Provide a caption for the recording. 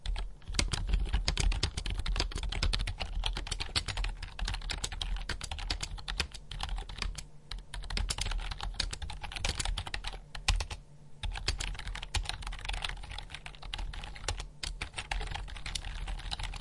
Creation date: 08 - 06 - 2017
Details of this sound:
Subject of recording:
- Object : Mechanical keyboard of computer
- Material : plastic
- Feature : none
Place of capture:
- Type : inside
- Size of the room : 8m^3
- Coating of room : Wood et plaster
- Content of the room : Home furnishings
Recorder:
- Recorder Used : Tascam DR-40 V2
- Type of microphone used : Condenser microphone
- Wind Shield : none
Recording parameters:
- Capture type : Stereo
- Input level : 27
Software used:
- FL Studio 11
FX added:
- Edison : For amplifying the signal